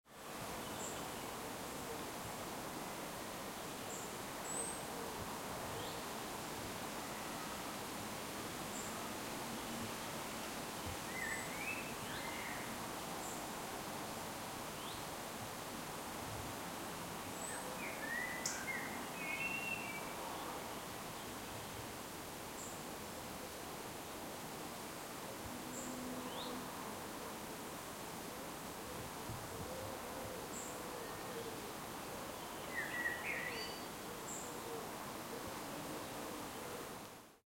clarice house woods ambience summer 3
Stereo recording of summer ambience within a wood close to houses and roads. Sounds of birdsong, wind in trees, distant activity and traffic.
distant-traffic, UK, ambient, ambience, forest, birds, light-wind, breeze, summer, trees, nature, birdsong, wind, woods, field-recording